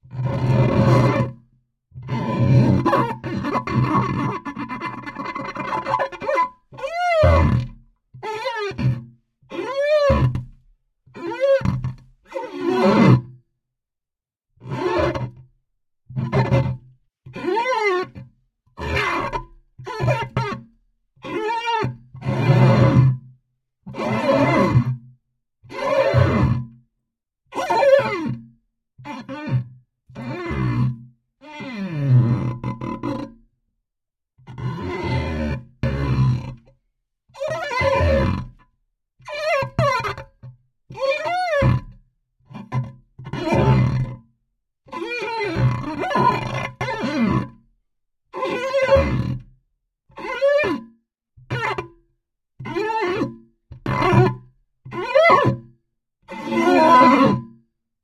20180426 Sliding on wet glass
friction, glass, onesoundperday2018, slide, sliding, squeak, wet